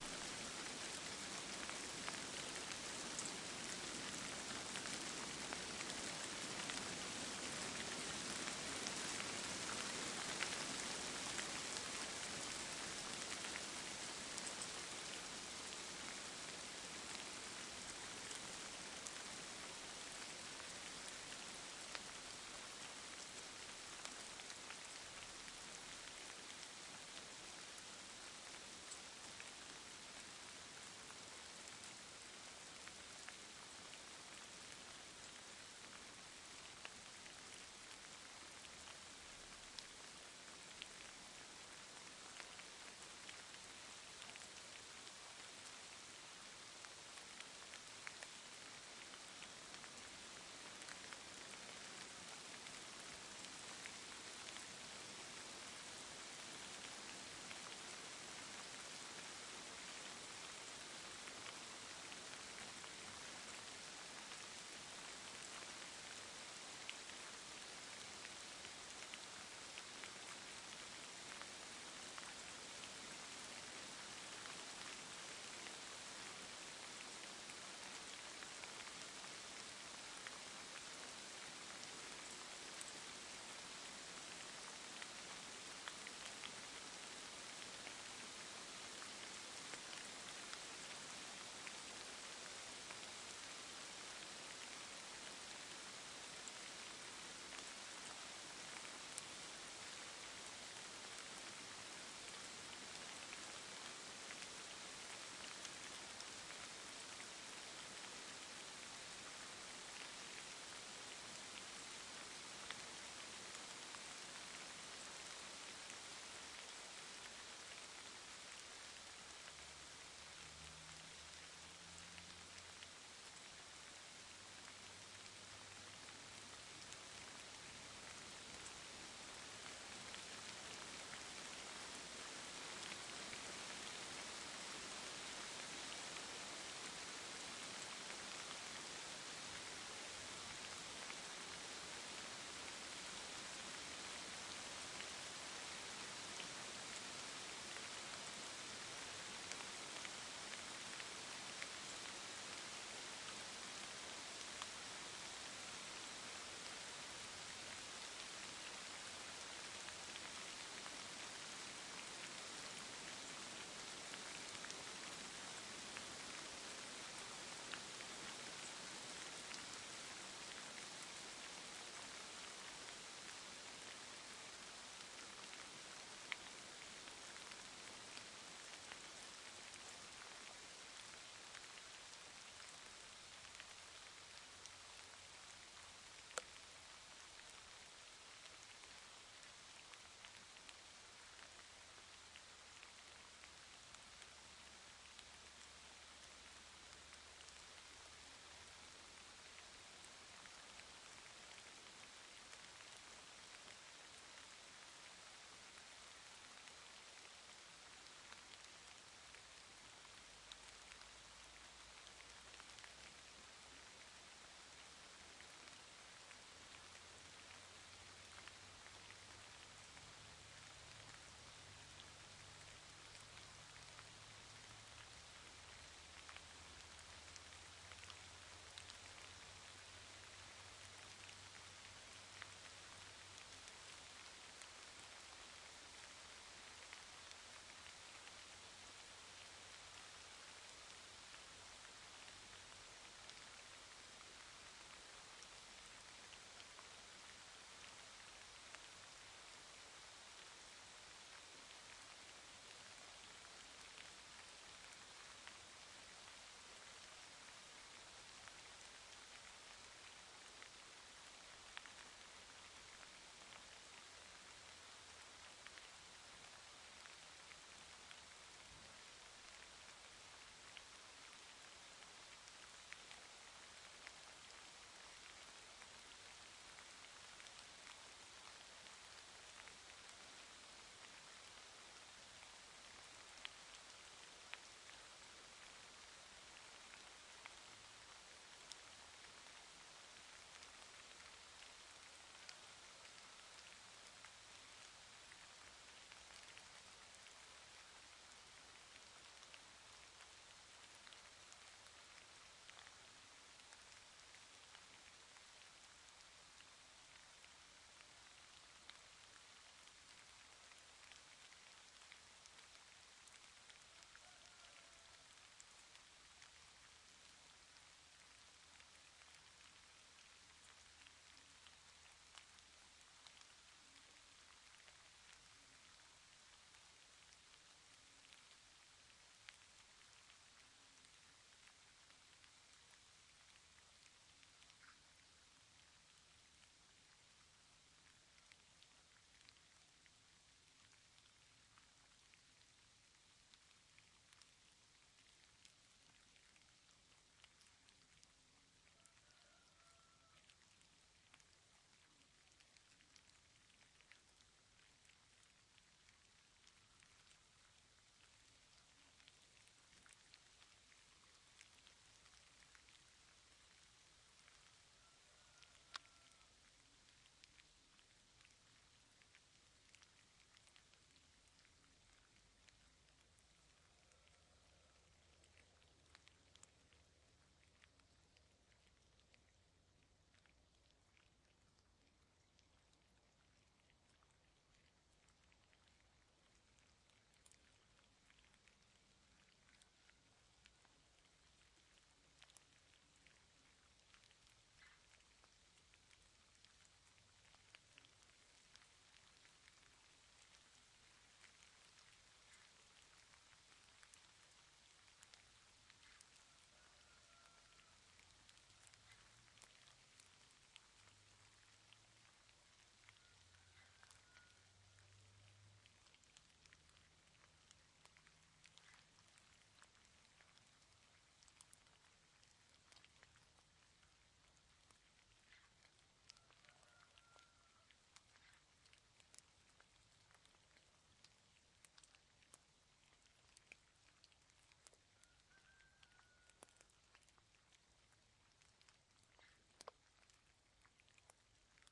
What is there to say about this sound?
Very large hail 2012-10-26
We had a great hail shower, with hail as big as a thumbnail. I was amazed, and ran inside to fetch my Zoom H2 once more. When the hail shower slows down, the near by rooster decides to give notice of its presence.
day, daytime, fall, garden, hail, hail-shower, home, large, large-hail, nature, noise, outside, rain, rooster, shower, weather, white, winter